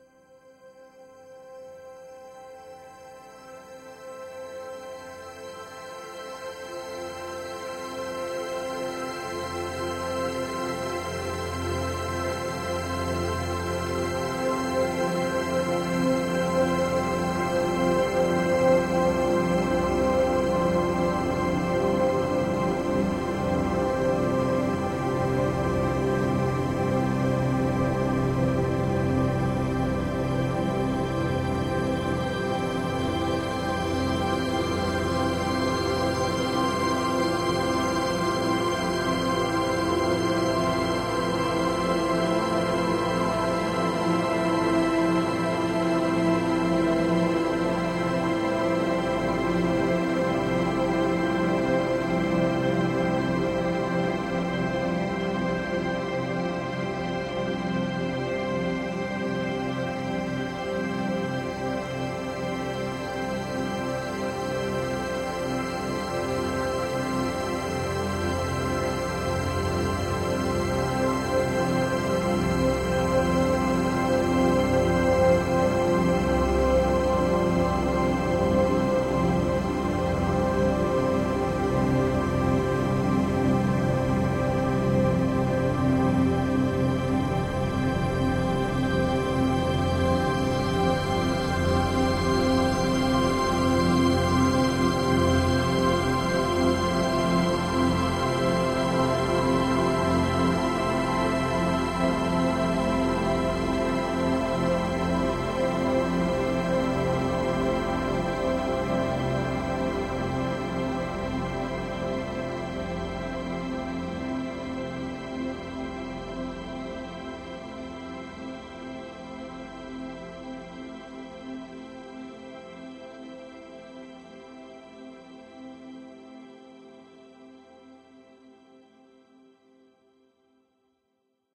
Made from synthetic and natural sounds. Vocal mixed with orchestral sounds.